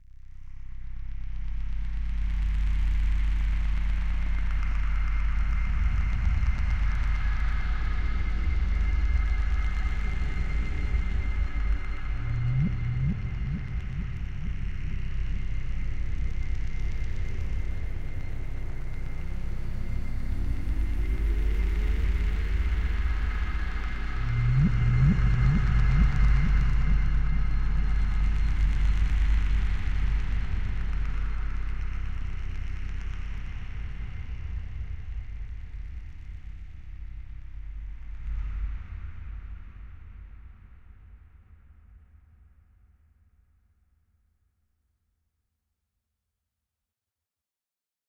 synthesised ambience001
An ambient drone created with a combination of Native Instruments Massive and some processing of drum samples. My first ever attempt at making ambience.
ambience, creepy, dark, delay, drone, mono, processed, reverb, synthesis, synthesised, synthesized